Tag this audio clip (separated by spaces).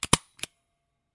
stapler staple work operation office